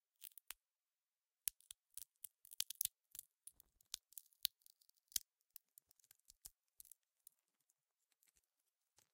Breaking open an almond using a metal nutcracker.
almond, crack, nut, shell